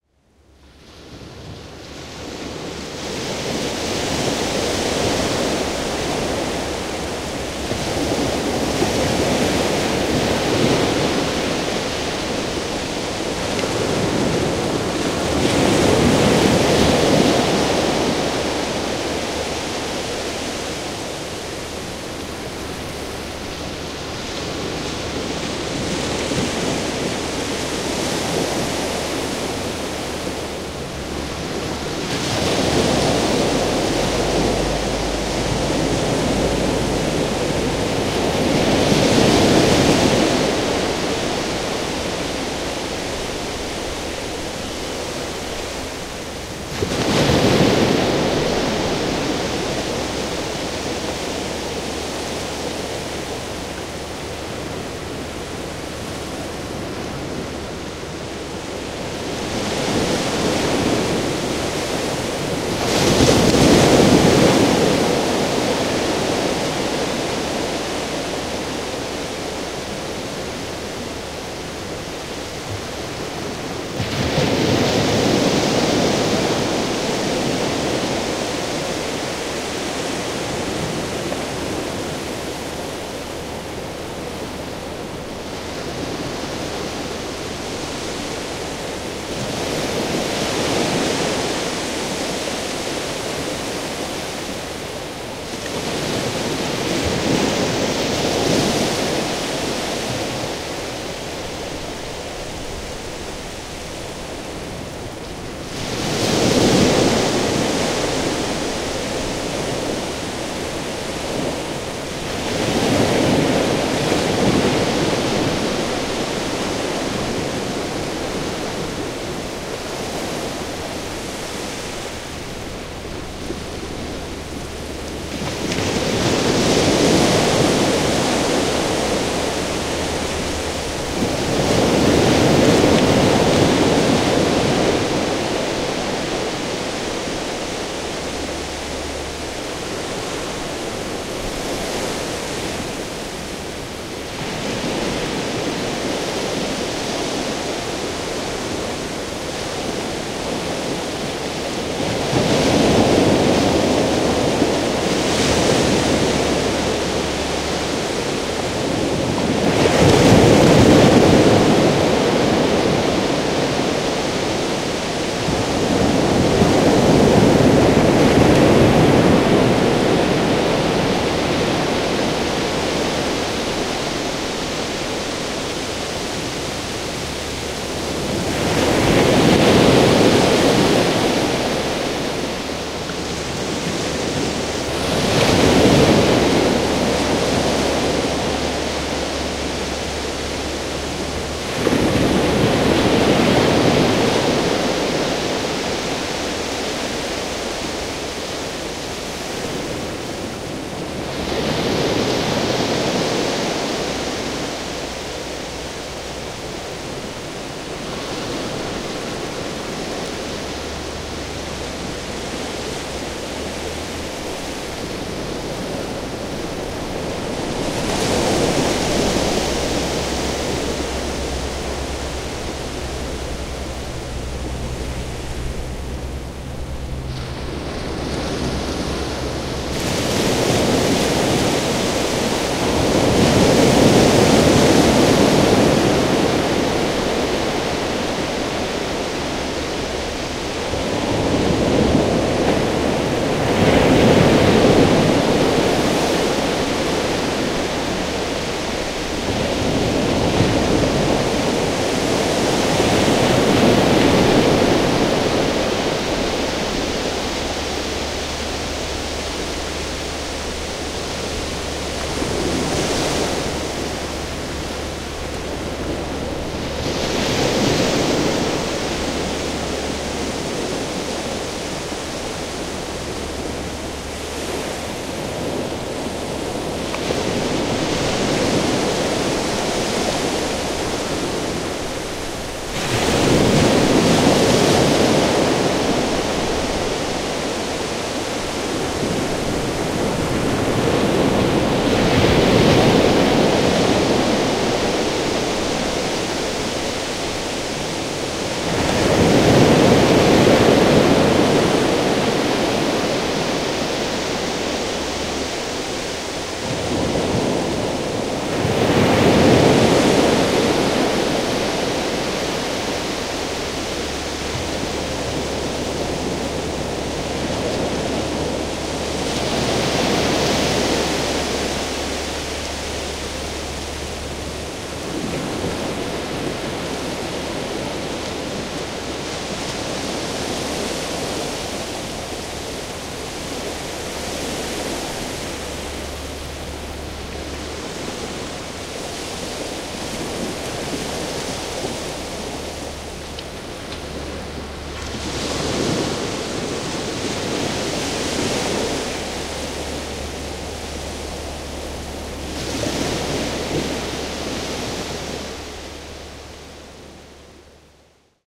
field recording of a desert beach in Britanny, France, big waves.
waves, vagues, sea-shore, britanny, plage, sea, beach, atlantic, mer